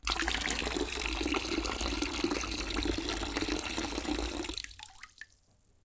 Water pouring
Poring a cup of water in to a plastic bucket to make a sound of a tap running. recorded with a Zoom H4n